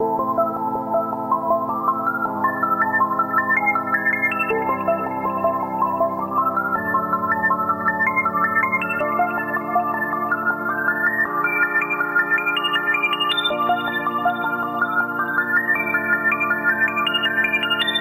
charlette 320 ternary
A small loop inspired by Celeste OST by Lena Raine. Not chiptune though. Various synth and strange melody. Arpeggiator
160bpm, ambiant, arpeggiator, celeste, electronic, loop, loops, music, spooky, synth, ternary